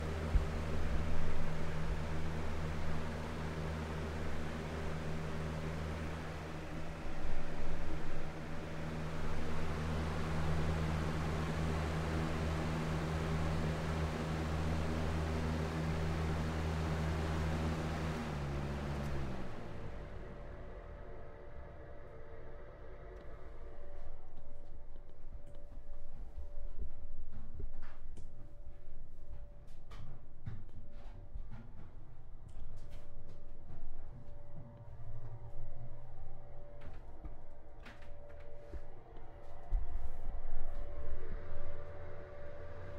int-BoxFanAmbiance1(condo)
Box fan recording of its different speeds with a windscreen.